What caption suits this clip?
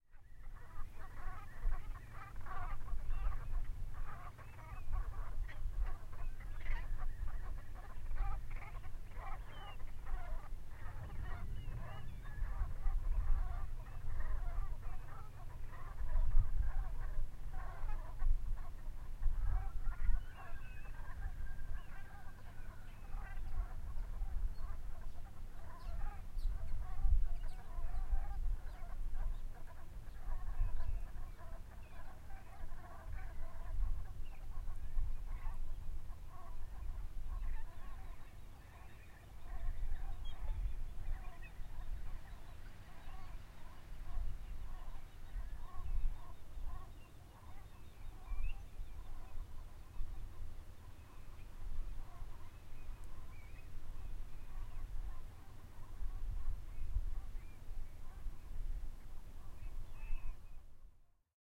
Distant gaggle of Brent geese with wading bird song. Recorded on a windy winter afternoon near Skippers Island, Hamford Water Nature Reserve, Essex, Uk. Recorded with a Zoom H6 MSH-6 stereo mic on a windy winter (January) afternoon.
field-recording, essex, bird, Hamford-water-nature-reserve, ambience, zoom-h6, honking, flying-geese, Brent, birds, nature, flight, geese, Brent-geese, msh6, wading-birds, uk, outside-ambient, estuary, stereo, birdsong, h6, hamford-water, Hamfordwater, msh-6, England, ambi